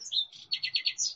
Bird - Pajaro 3
Bird singing at night.